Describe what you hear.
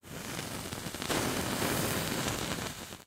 Fire Fuse Ignite Flame Mid
burning, Ignite, burn, sizzle, Flame, Fuse, fire, sizzling, frying